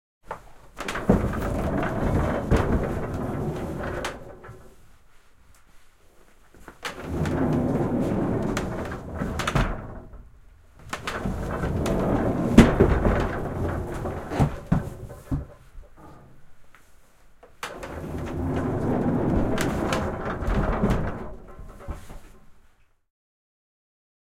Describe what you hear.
Tallin puinen liukuovi auki ja kiinni. Erilaisia.
Paikka/Place: Suomi / Finland / Vihti, Kirvelä
Aika/Date: 23.11.1992